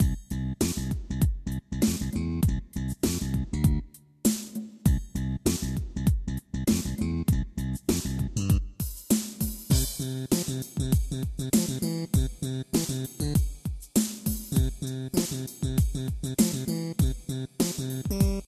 recorded from my yamaha psr... i was bored and, this is the result... for some reason it reminded me of super nintendo... lol
video-game, sounds, groove, i-was-bored, game, sound, boredom, slap-bass